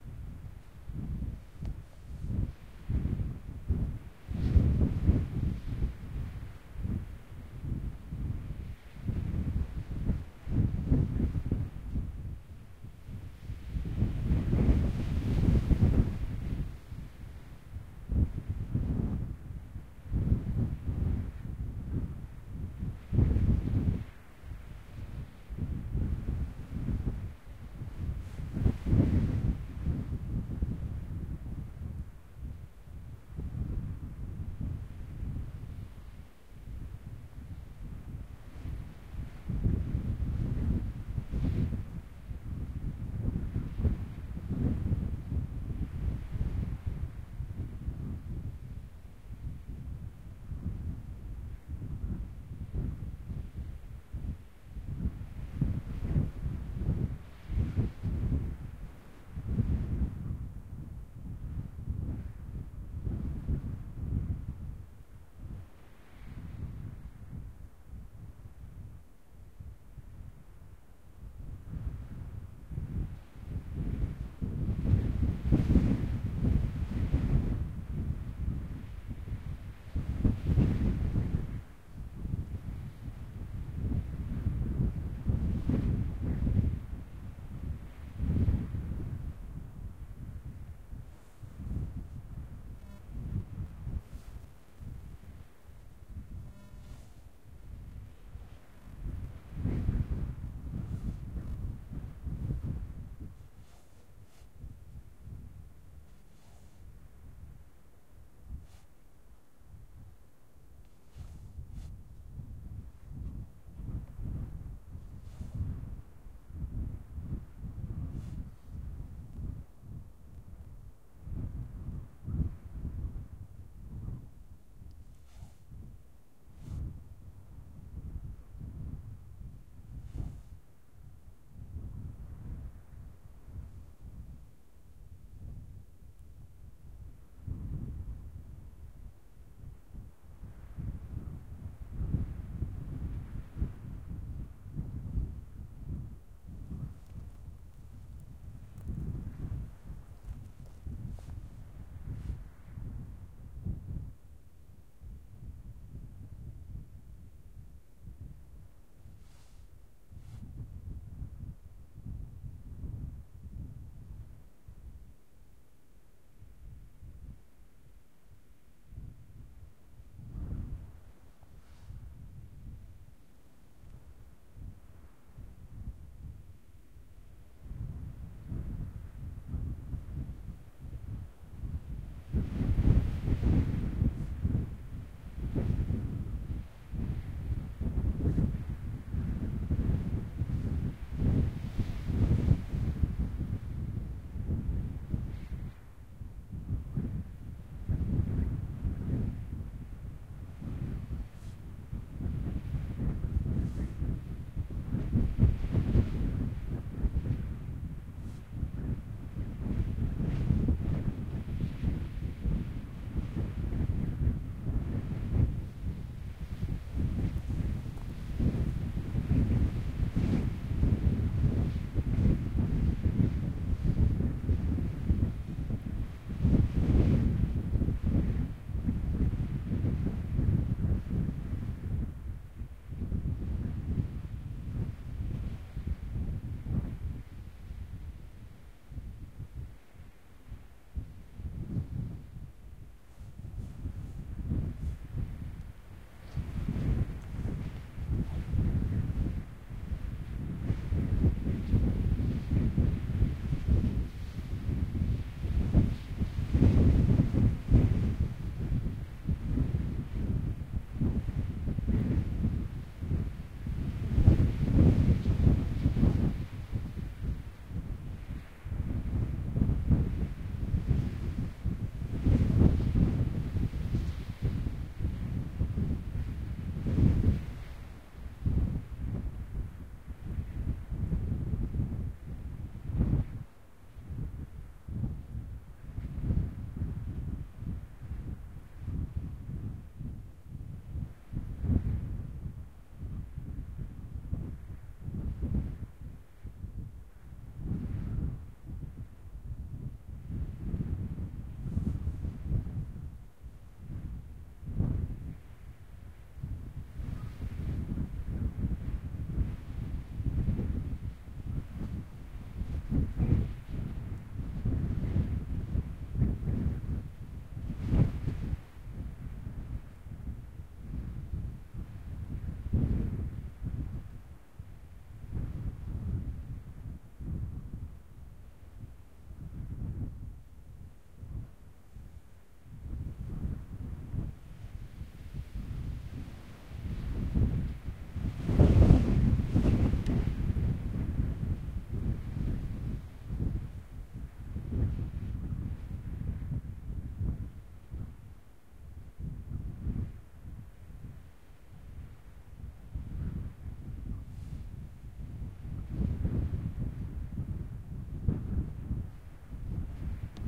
windy mountain plains
A windy afternoon on top of a mountain. There is some interference from 4:25 to 4:18. Recorded with a tascam dr-05.
field-recording, mountain, weather, plains, isolated, plain, mountains, clear, wind, nature, windy, gusts